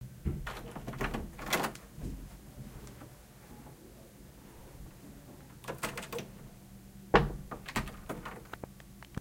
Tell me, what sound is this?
Recorded with a black Sony IC digital voice recorder.